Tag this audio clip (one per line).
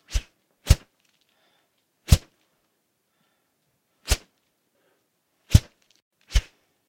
Hard
Slash
Fight
Fighting
Leg
Whoosh
Move
Hit
Fly-by
Punch
Attack
Swing